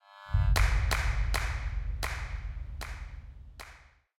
Clappic - Epic Clap Ceremony Symbol Sound
alien, ceremony, clap, claps, dancers, echo, energy, epic, hall, holy, level, levelup, military, sci-fi